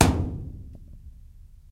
Metal hallow object hit
bass, big, boom, kick, low, metal, object, thump